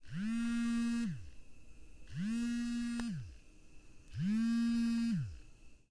Phone Vibrate
A cell phone with the ring set to vibrate. Held as close to the mic and recorded as loudly as possible. Still a little soft.
buzz, cell, drone, electronic, MTC500-M002-s13, phone, vibrate